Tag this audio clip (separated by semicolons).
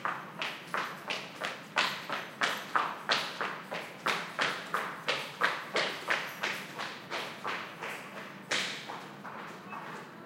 female; footsteps